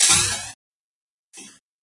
cooledit basement
2nd set of impulse responses created in Cool Edit 96 with the "echo", "delay", "echo chamber", and "reverb" effect presets. I created a quick burst of white noise and then applied the effects. I normalized them under 0db so you may want to normalize hotter if you want.
96, convolution, cool, edit, impulse, ir, presets, response, reverb